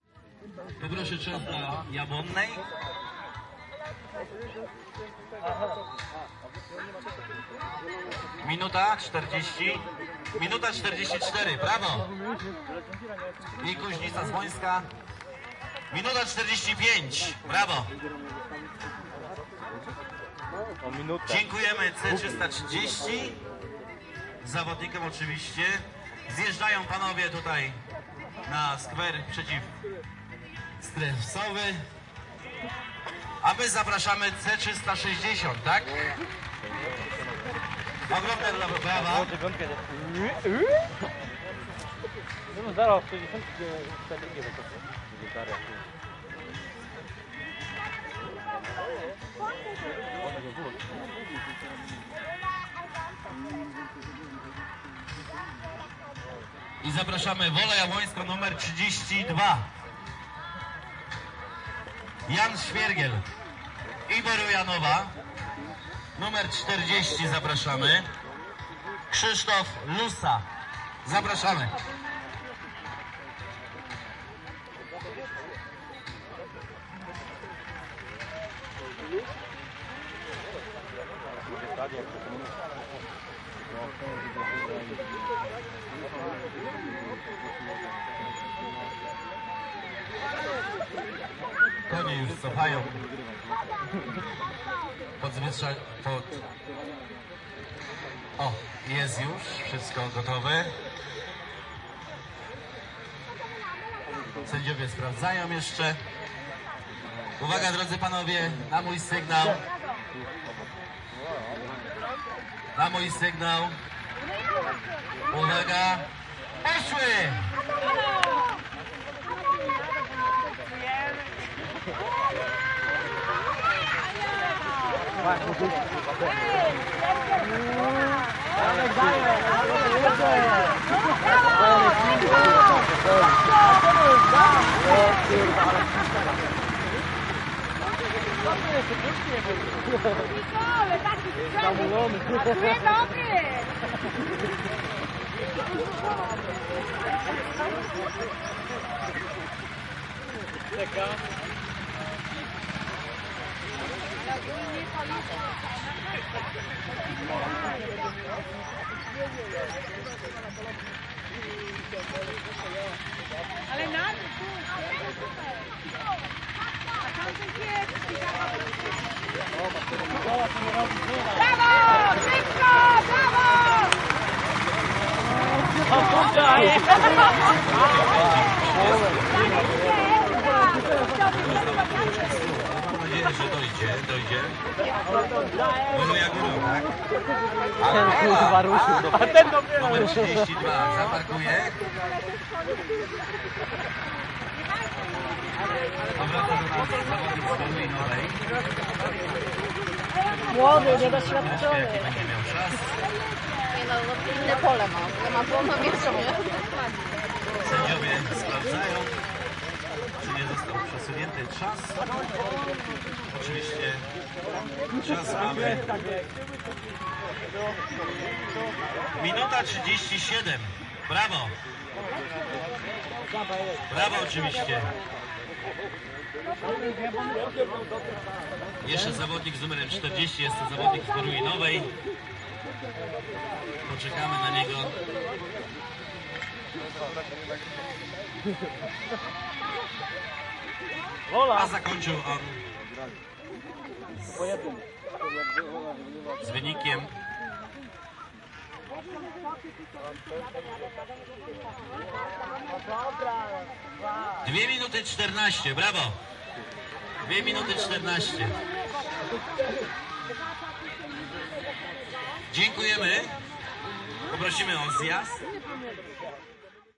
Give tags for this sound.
noise
Wielkopolska
Poland
voices
ethnography
machine
Jab
rural
tractor
race
crowd
quad
Wola
people
village
fieldrecording
o
ska

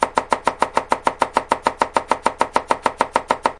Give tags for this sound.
animation
cooking
cut
cutting
diner
food
kitchen
onion
slicing
vegetables

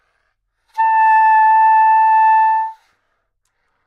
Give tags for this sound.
good-sounds
A5
single-note
neumann-U87
soprano
sax
multisample

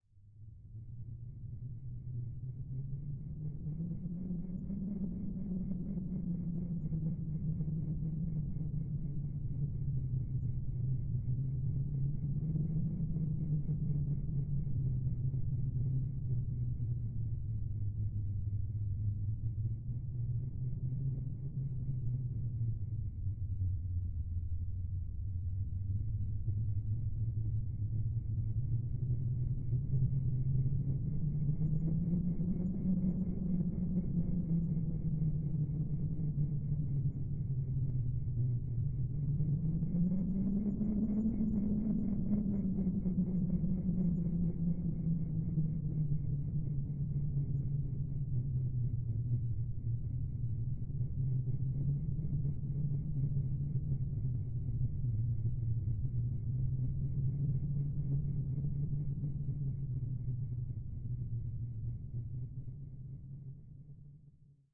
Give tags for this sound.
Autumn
Breezy
Day
Natural
Nature
Sounds
Stormy
Whirling
Whooshing
Wind
Windy

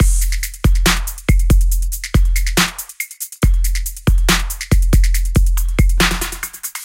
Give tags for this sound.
beat
bpm
dance
drums
edm
fills
free
groove
hydrogen
kick
korg
library
loop
pack
pattern
sample

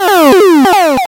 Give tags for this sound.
8
bit
game
sample
SFX

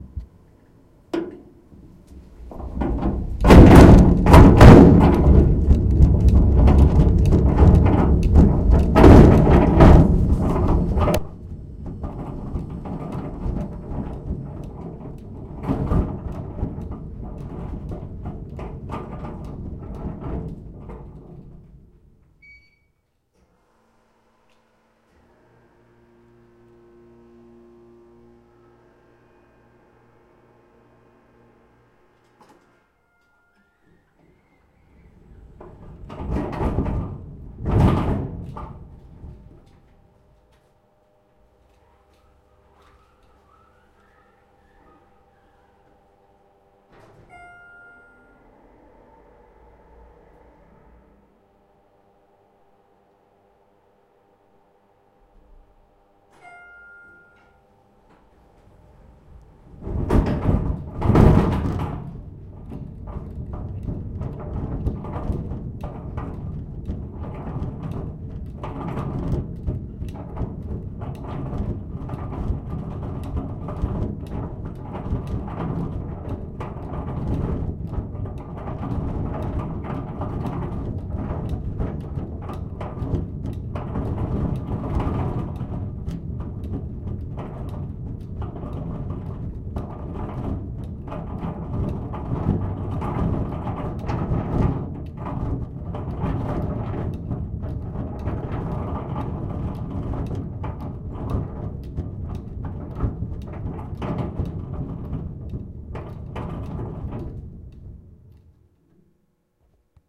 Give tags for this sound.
cart
shelves
moving

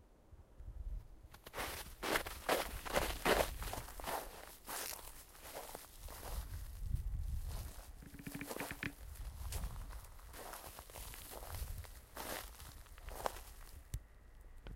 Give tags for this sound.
foot-steps
walking

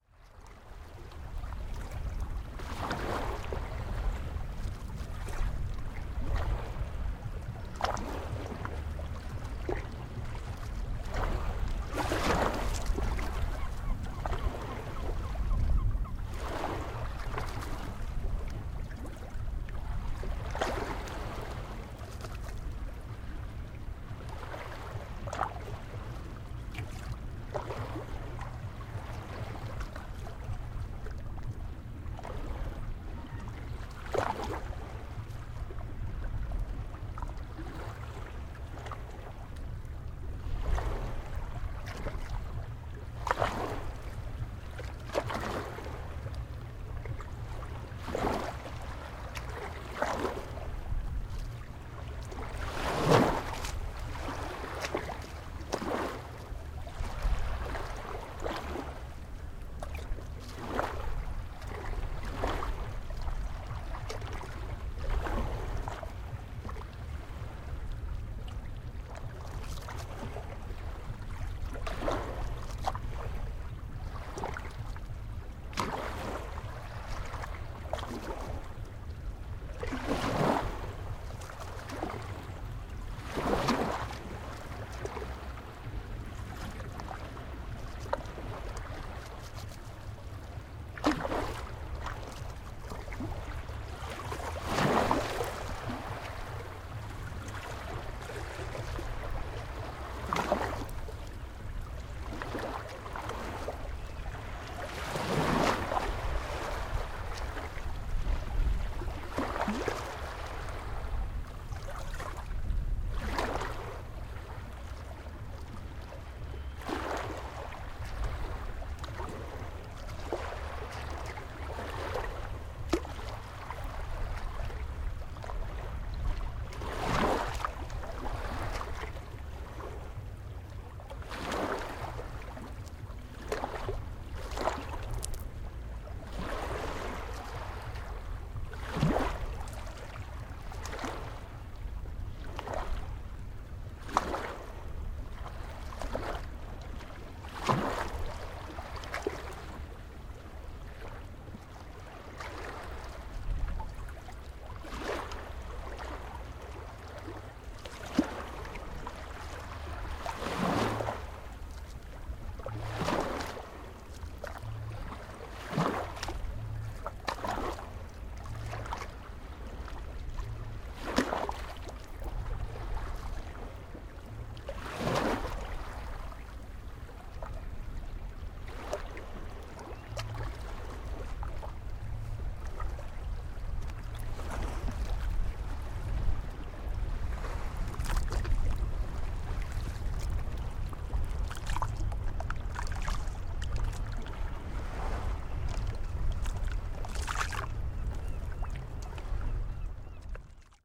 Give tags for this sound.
ambient
waves
sea
field-recording
seafront
Seaside
gentle-sea

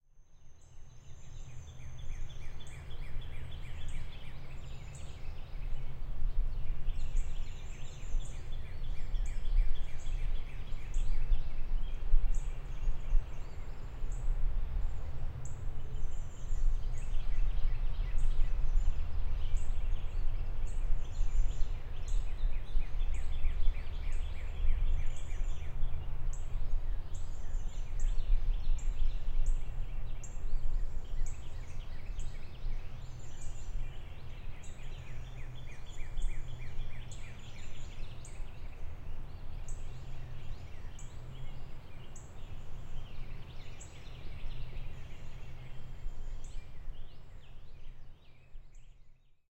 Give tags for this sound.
air
birds
natural
nature
stereo
wind
woods

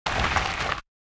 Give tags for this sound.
H4n
foley

